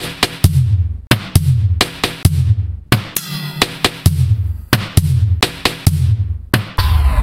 Thank you, enjoy
drums, beats